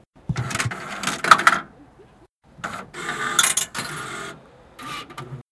Sound Description: Put money in a parking ticket machine and printing a parking ticket
Recording Device: Zoom H2next with xy-capsule
Location: Universität zu Köln, Humanwissenschaftliche Fakultät, Herbert-Lewin-Str.
Lat: 50,9345
Lon: 6,9219
Recorded by: Sandra and edited by: Sandra and Andreas